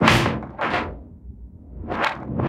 loop perc 2
Percussive & filtered short rhythm loop for Sonic Pi Library. Part of the first Mehackit sample library contribution.
loop, filtered, drum-loop, mehackit, sample, rhythm, sounddesign, sound-design, drum, effect